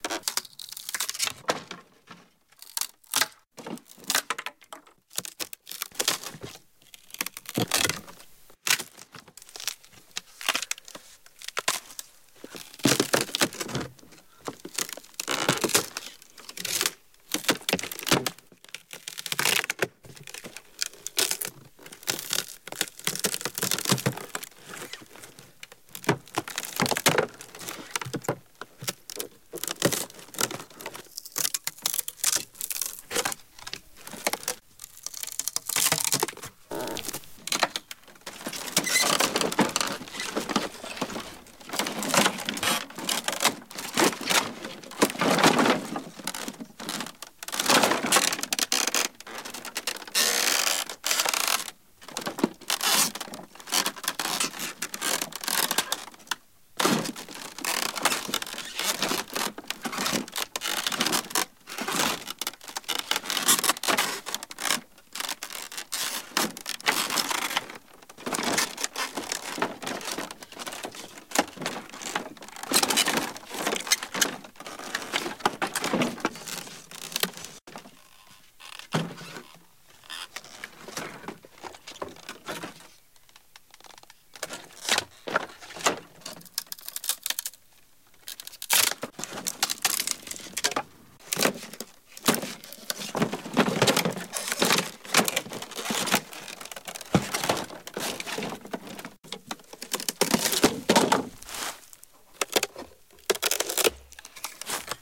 Wood-break-stress
stretch, stress, Wood, breaking, breaks